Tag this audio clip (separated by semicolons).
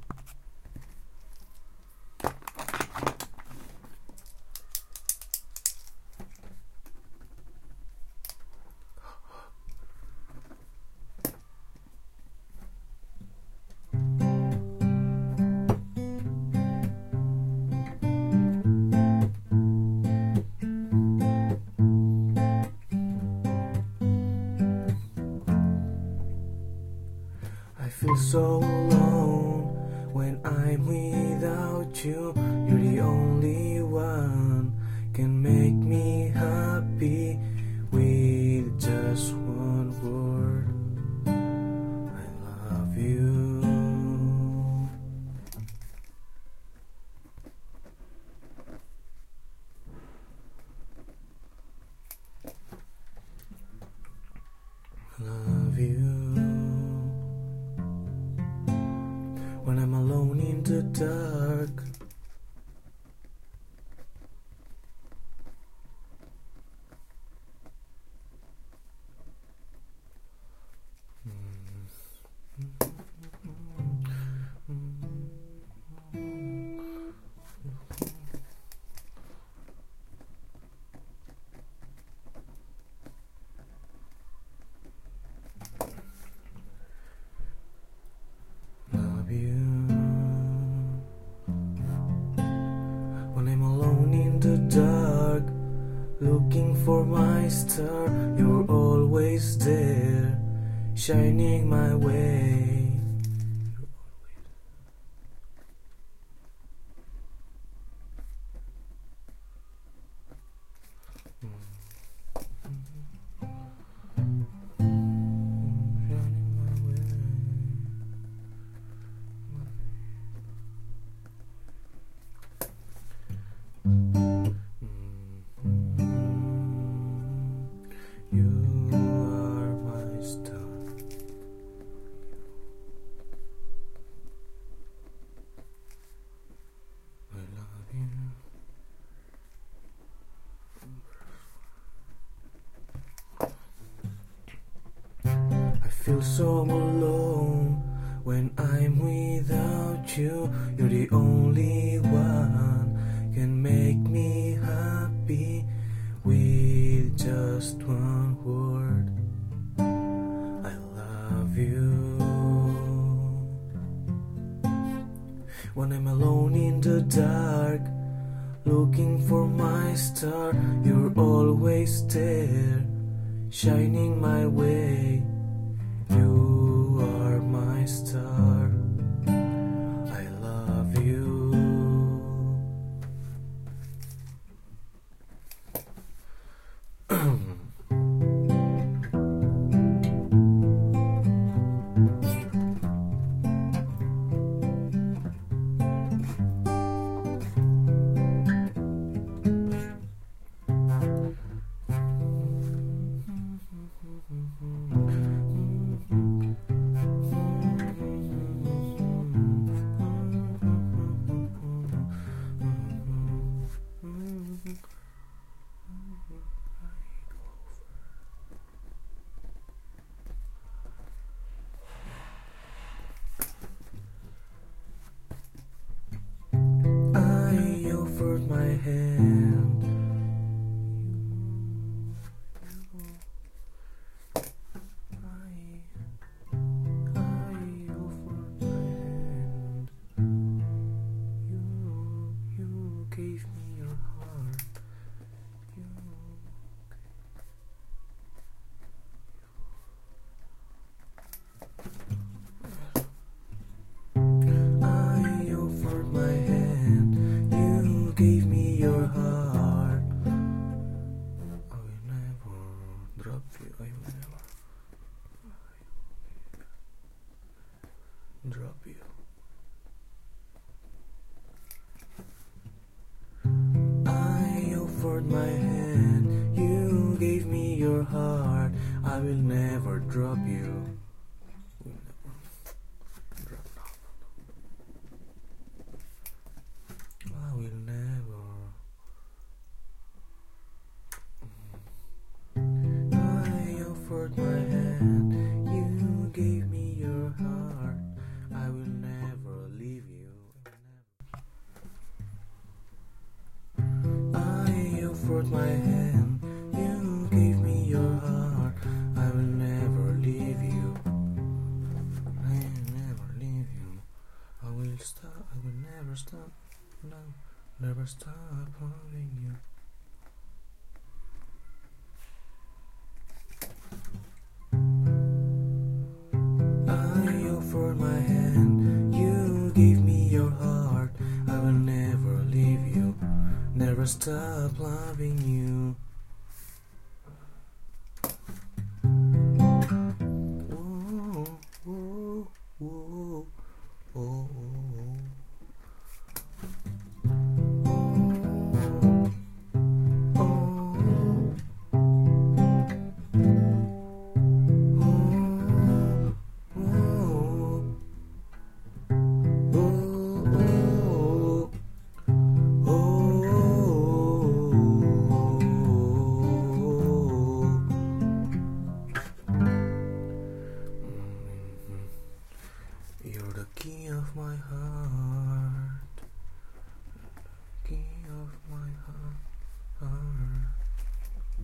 gostj; acoustic; canci; spain; intercultural; filipino-community; own-song; composed; elsodelescultures; punk; casa-asia; english; james; love-song; n; song; barcelona; gates-of-st; classic; key-of-my-heart